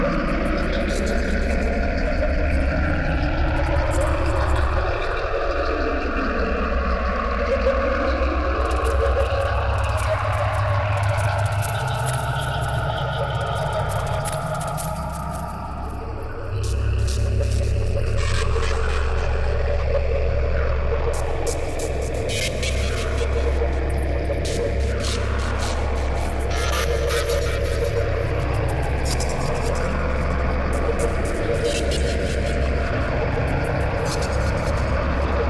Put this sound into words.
Ambience Hell 00
A dark and hellish ambience loop sound to be used in horror games. Useful for evil areas where sinister rituals and sacrifices are being made.
hell, indiegamedev, game, sfx, frightening, horror, gaming, video-game, indiedev, gamedeveloping, games, rpg, videogames, gamedev, fear, terrifying, scary, fantasy, ambience, epic, frightful